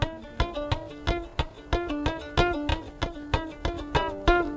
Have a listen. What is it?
fiddle melody 05
here the fiddler is picking the strings with his fingers as opposed to
bowing it (as is heard in my other fiddle samples on this site)